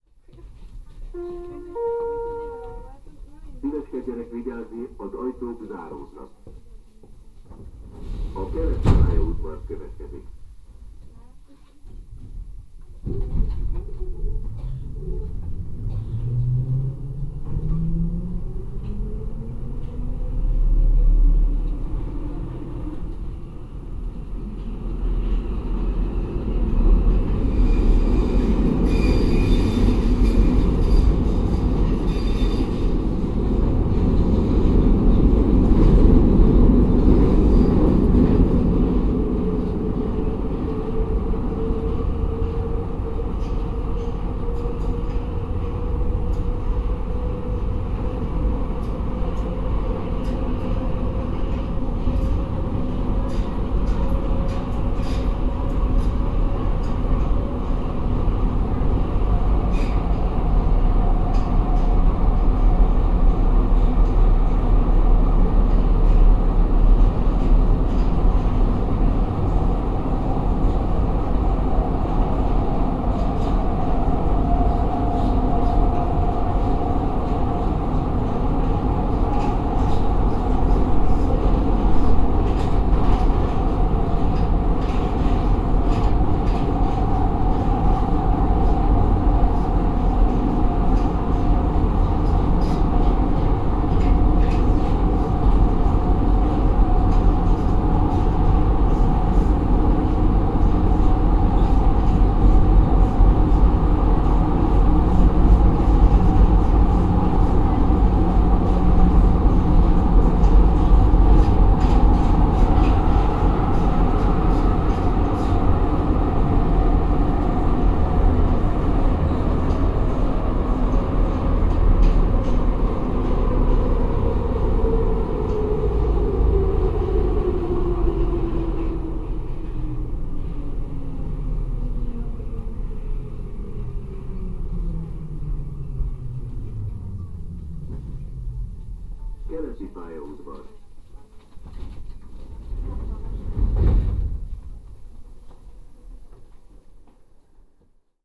Budapest Metro line 2. The train is going deeper and deeper into the ground between station Stadionok and station Keleti Pályaudvar.
Stereo remix.
railway, subway, vehicle, horror, train, fast, budapest, motor, thriller, metro, field-recording